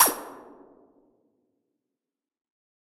ss-zappersnare
Electro Pulse Snare tone with a bit of a ray gun feel